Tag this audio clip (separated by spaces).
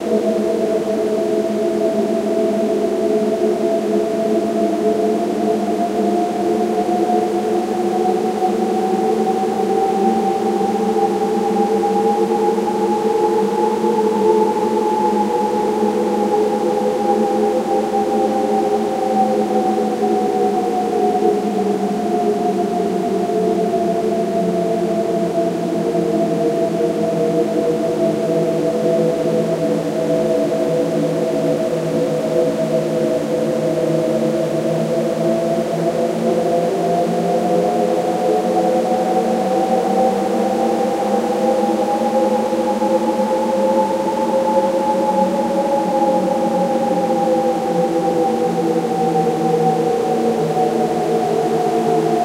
ambience ambient atmo atmosphere background creepy dark drone evil haunted horror mystical noise otherworld otherworldly scary Scifi sinister soundscape space spooky tension terrifying terror weird white-noise wind